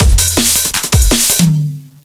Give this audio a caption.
Dnb Loop 3 162BPM
A simple DnB loop made in FL Studio.
162bpm, DnB, Drum-and-Bass, loop, loops